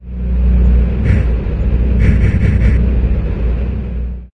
fire combined
This is a creatively tweaked file of white noise that has manipulated to simulate the sound of a gas stove burner being turned on, combined with an abstract representation of the sonification of fire in the background.